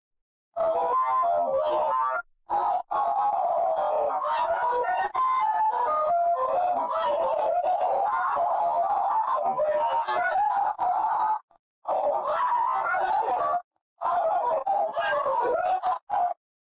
scream convolution chaos
The scream male_Thijs_loud_scream was processed in a home-made convolution-mixer (Max/MSP) where it was mixed with the convolution of it's own sound, but at different times. Pure chaos.
noise, chaos, processed, fx